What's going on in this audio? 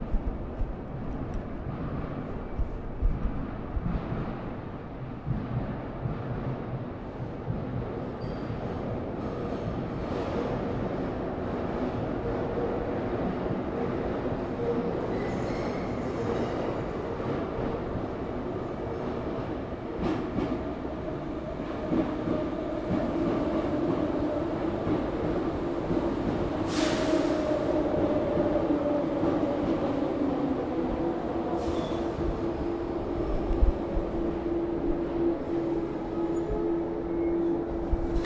Train coming
Recording the train in the metro stasion
Railway, Train, Station